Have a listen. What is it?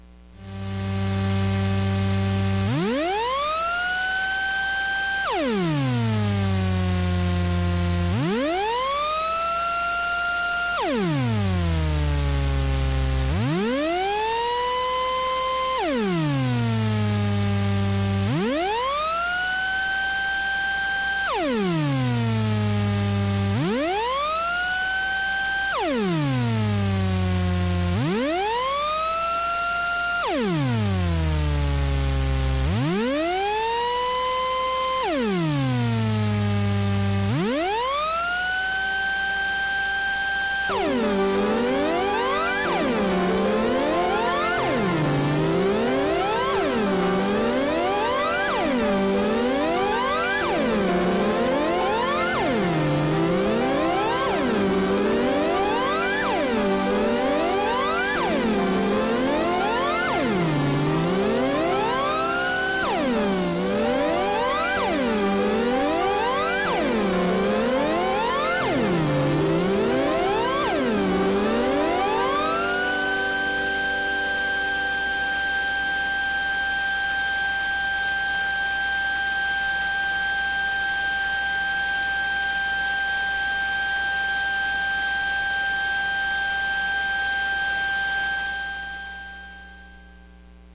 minibrute-test
Glide test with Arturia Minibrute with Yamaha Clavinova. July 19, 2019. Key of G major.
loop, arturia, minibrute, yamaha, piano, electronic, digital, glide, clavinova